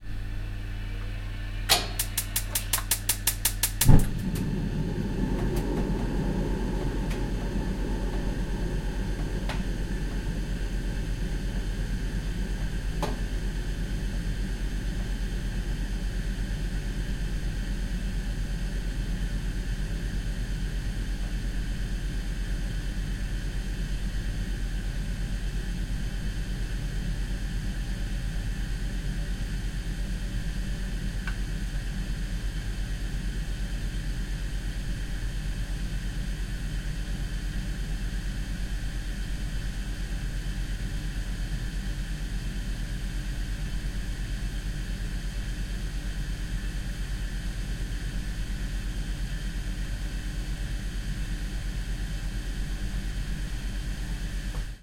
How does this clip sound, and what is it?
gastherme normalized
Gas boiler in my kitchen starts up, you can hear the piezo ignition inflaming the gas and the subsequent burning gas.
This version is unprocessed.
Recording by a Zoom H2n